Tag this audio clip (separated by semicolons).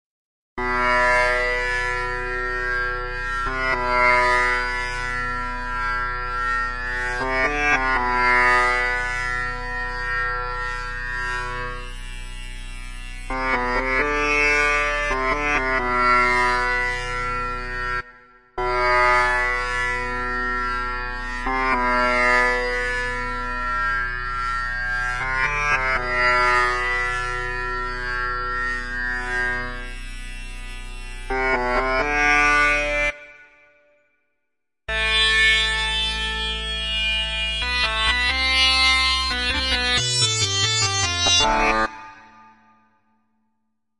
Mystery; spooky; strings; adventure; electric; magic; tense; string; foreboding; dark; mysterious; tension